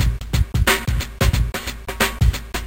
Drumloop - Funky Drummer with swing
90 BPM. The classic "funky drummer" beat, originally made by Clyde Stubblefield for James Brown, but "swinged".
Exported from Hammerhead Rhythm Station (freeware)
Edited with FruityLoops Studio
(All of this years ago)
90, beat, breakbeat, hiphop, swing